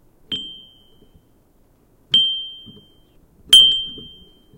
a wind chime